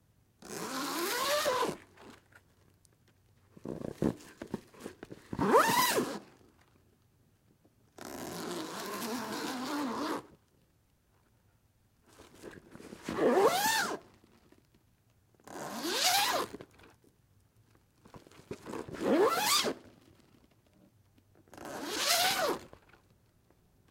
Zipper for a tent or large bag
Zipping open and closed at various speeds. Recorded with a rigid tripod bag, with the intended purpose of voicing a tent being zipped up and down. Raw audio, unprocessed.
canvas, Zipper